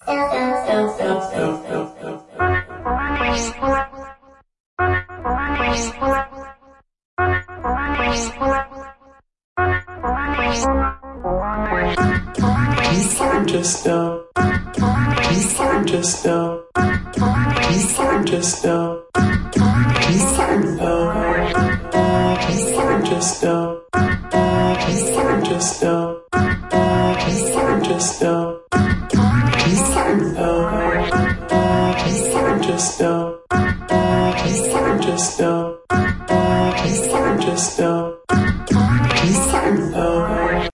Sounding Dumb
female, loop, smooth, sound, voice